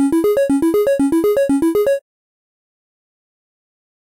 8 bit arpeggio 001 minor 120 bpm triangle 026 Cis3
sega, game, 8-bit, gameloop, loops, loop, mario, 120, electro, bit, synth, drum, 8-bits, free, beat, 8